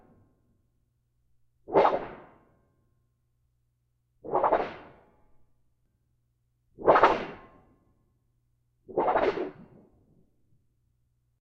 SHEET METAL BEND NOISE 1
A stereo recording of a 4' x 4' piece of sheet metal being shook around.
Stereo Matched Oktava MC-012 Cardioid Capsule XY Array.
bending, field-recording, metallic